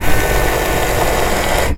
sons cotxe retrovisors 2 2011-10-19
field-recording, car, sound